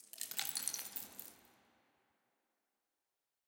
Dropped, crushed egg shells. Processed with a little reverb and delay. Very low levels!
crush, eggshell, crunch, crackle, splinter, ice, drop